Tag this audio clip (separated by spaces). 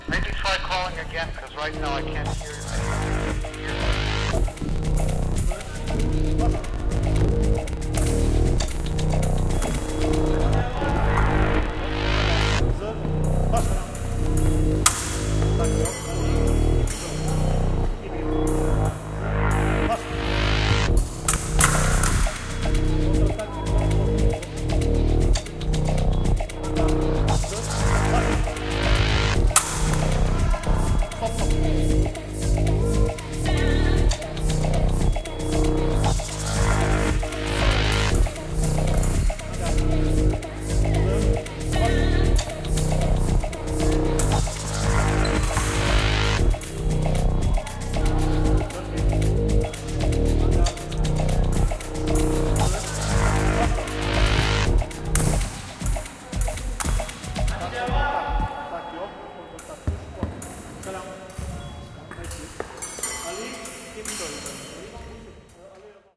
mix synth Collage